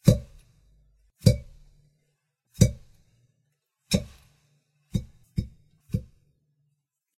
Sounds of a suction cup.